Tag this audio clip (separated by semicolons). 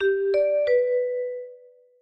notification; ring; message; chat; alert; phone; doorbell